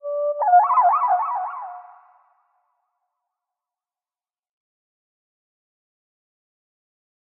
Krucifix Productions extinct bird chirp
extinct like bird chirp sound effect
birds, dinosaur-bird, forest